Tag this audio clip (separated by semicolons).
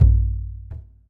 world; hit; frame-drum; drum; simple; deep; perc; low; oneshot; sample; percussion; drumhit; drum-sample; recording; raw